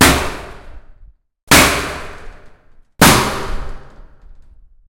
iron snare recording in H4n ZooM at iron box car